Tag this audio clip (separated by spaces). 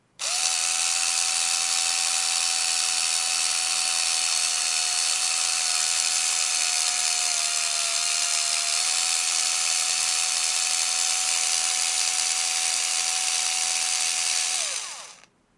Microphone screwdriver